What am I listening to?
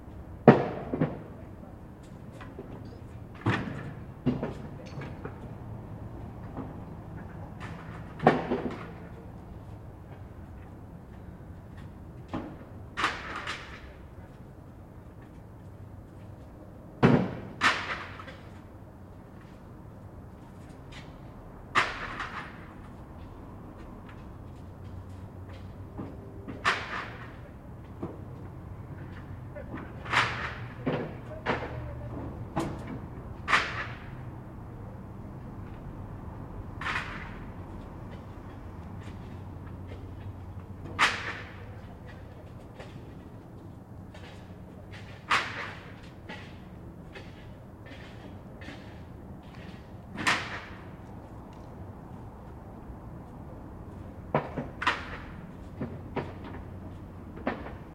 throwing stuff
Some construction workers throwing wood and other items around while raking and shoveling go on.
shovel, rake